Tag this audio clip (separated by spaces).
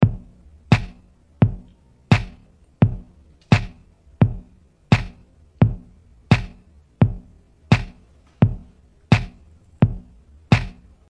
sound electric